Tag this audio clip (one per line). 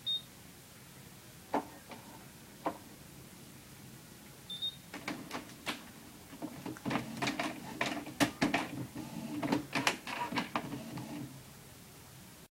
beeps
machine
electrical
mechanical
printer
electronic
click